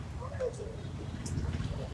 voces oeeuu..
Registro de paisaje sonoro para el proyecto SIAS UAN en la ciudad de santiago de cali.
registro realizado como Toma No 05-voces oeuuu plazoleta san francisco.
Registro realizado por Juan Carlos Floyd Llanos con un Iphone 6 entre las 11:30 am y 12:00m el dia 21 de noviembre de 2.019
05, Soundscape, Of, Sounds, Paisaje, oeuu, Sonoro